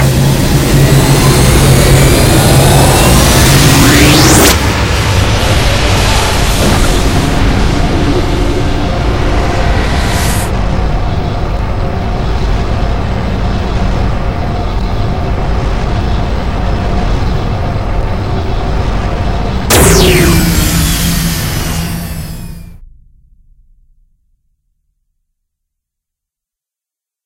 Hyperdrive Sound Effect 2
Another hyperdrive/warpdrive-esque sound effect. Actually, this is my original hyperdrive sound effect, with a bunch of other sounds overlapped on top, with a completely different running loop, which begins being loopable about 11 seconds in. Mostly made in Audacity, with some machinery noises recorded from around my house, and of course the first hyperdrive sound. Have fun!
warp, space, spaceship, sci-fi, hyperdrive